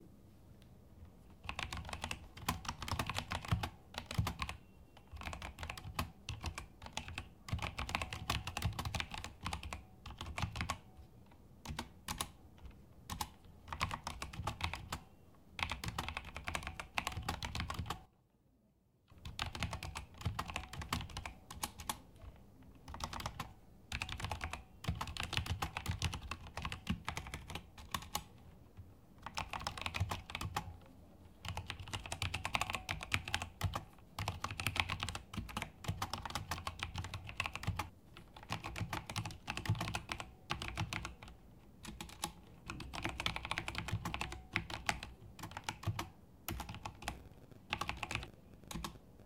mechanical keyboard typing
Typing with a mechanical keyboard in my room.
keyboard, indoor, computer, mechanical-keyboard, field-recording, typing